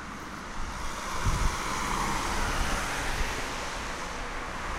Car passing by
car, car-passing